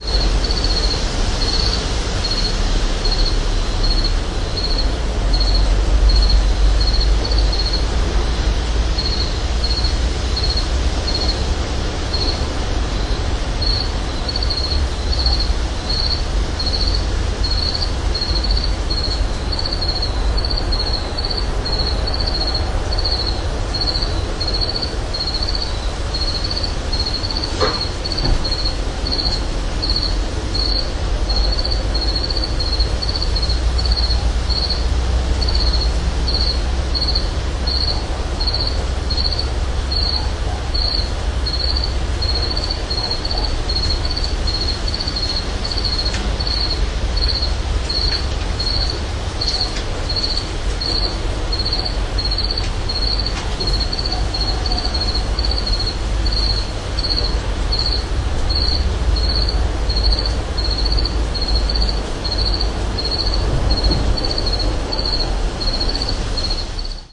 Here is a short recording with my new Olympus dictaphone noise at night in the countryside. Recording made in the south of France to 10:00 pm.
Noise, wind, night, countryside